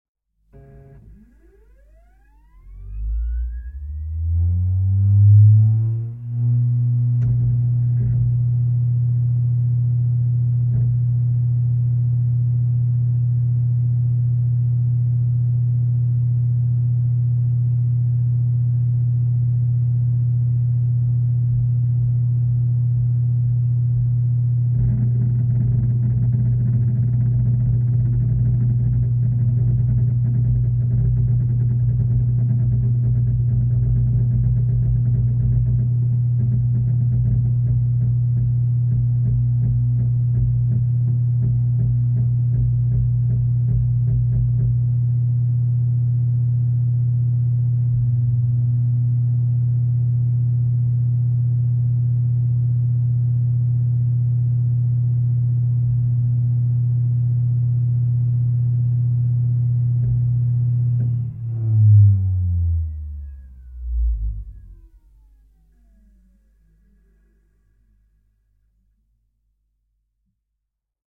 sat receiver harddisk turn on boot turn off 02
A sat receiver with harddisk is turned on, it boots and it is turned off.
Recorded with the Fostex FR2-LE and the JrF C-Series contact microphone.
boot, harddisk, harddrive, receiver, sat, turn-off, turn-on